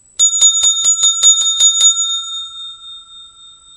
service bell multi
The sound of a desktop service bell hit or rung once mostly for attention, or service. Sometimes this bell, or sound is used as a times up sound for contests, or to end a competition, or match.
bell, desktop-service-bell, multi-rings, noise, ring, service-bell, sound, times-up-bell